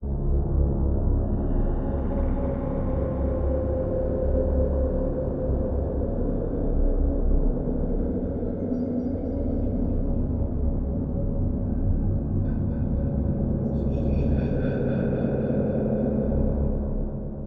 drone soundscape sounds like dark side of the moon